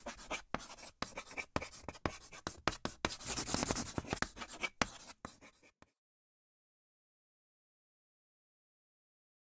chalkboard-writing-pan-timelapse
writing on chalkboard, panning effects
blackboard, board, chalk, chalkboard, classroom, dot, draw, drawing, marker, paint, pencil, school, scrape, tap, write, writing